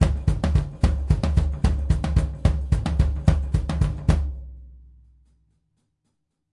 TomGroove1 2m 110bpm
Acoustic drumloop recorded at 110bpm with h4n as overhead and a homemade kick mic.
acoustic, drums, h4n